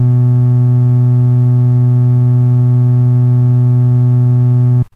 I recorded this Ace tone Organ Basspedal with a mono mic very close to the speaker in 16bit